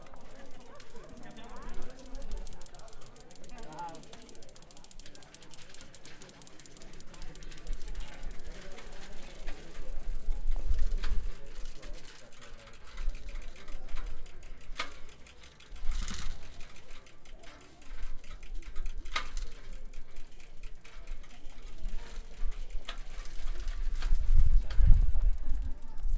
Human Bike Sound Archive.
Walking with a bicycle near Santa Maria Maggiore.